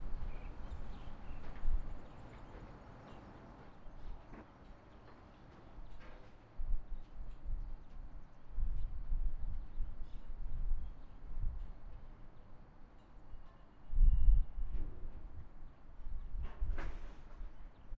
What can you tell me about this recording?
160170 warm afternoon OWI
A warm summers afternoon with a little bit of construction